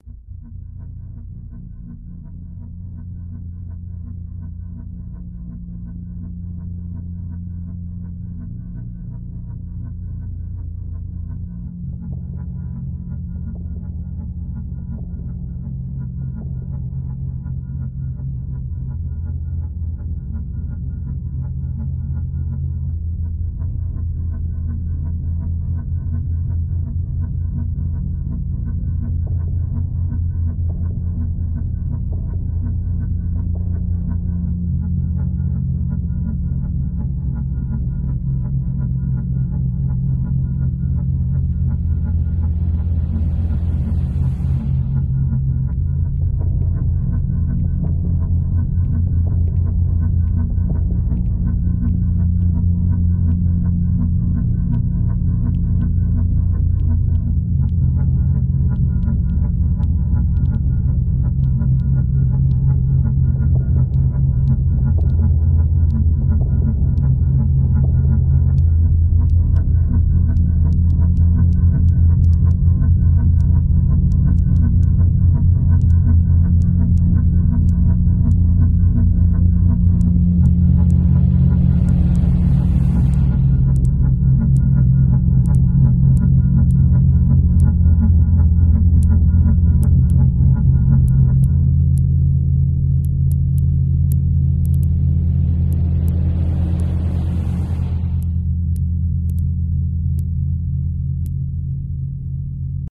Typical ambient music often found in surreal gallery exhibitions or pretentious art films. Kept it low quality on purpose to further enhance the rawness.
ambient, low, cruel, bass, dark, exhibition, gallery, art